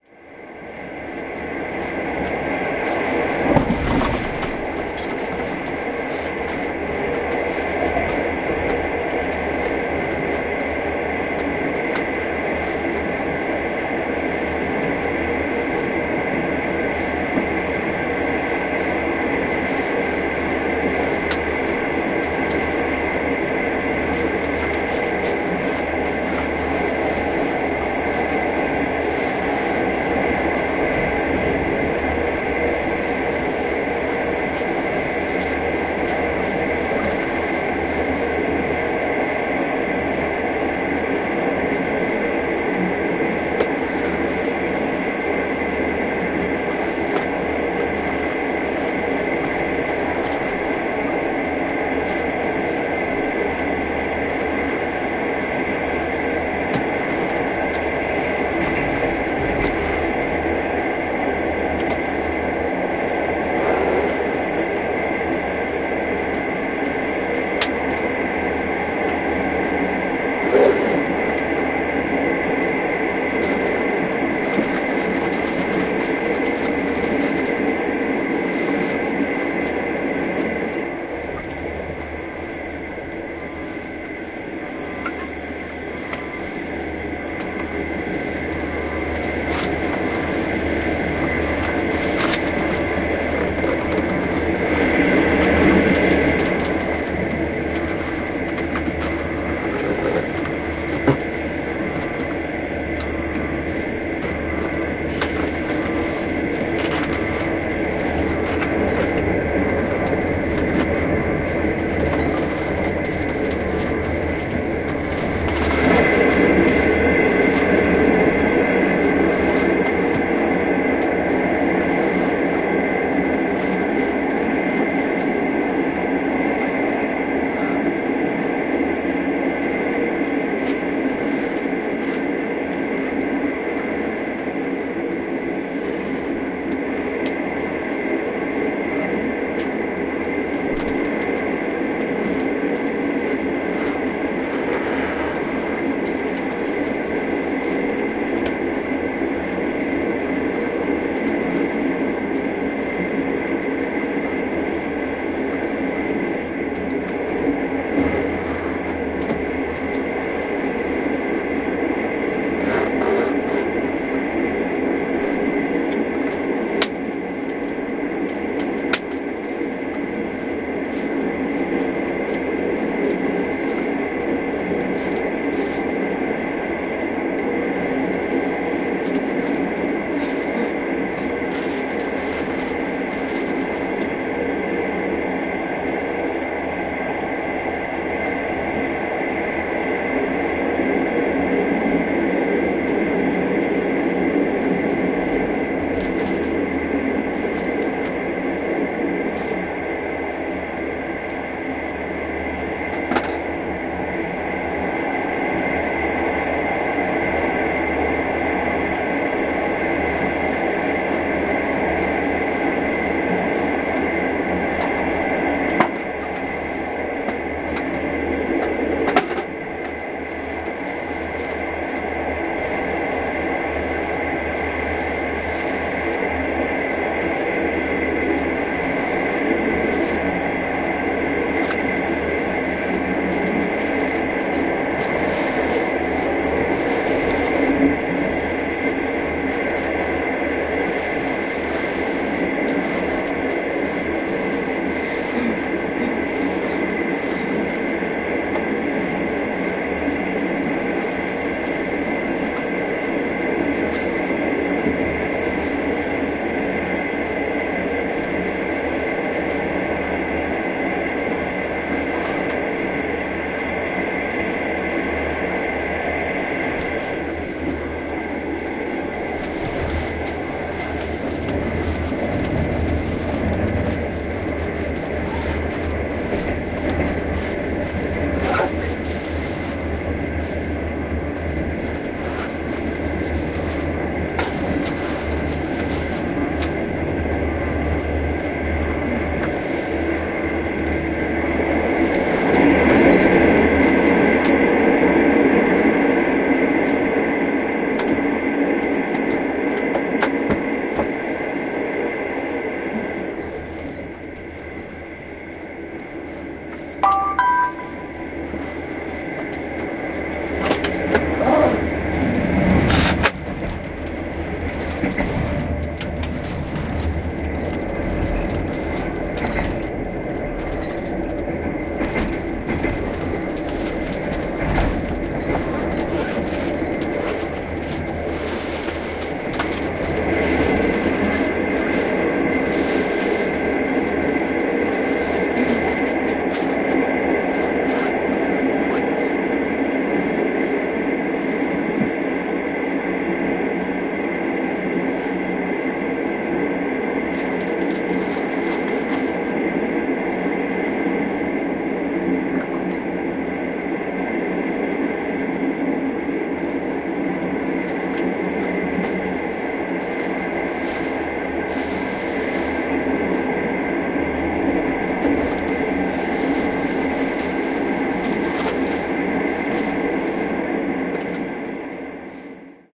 Train Sounds From a Sleeper Cabin on the Trans-Mongolian Railway
Loud, clunky railway noises heard within a small sleeper cabin on the world-famous Trans-Mongolian / Trans-Siberian Railway, chugging through Perm, a city and the administrative centre of Perm Krai, Russia, located on the banks of the Kama River in the European part of Russia near the Ural Mountains.
Recorded with a SONY ICD-UX560F
transport, vibrations, rail, rail-way, train, rail-travel, russian, travel, riding, old-train, railway, trans-siberian, rail-road, sleeper, mongolian, passenger-train, russia, chinese, mongolia, international-travel, china, adventure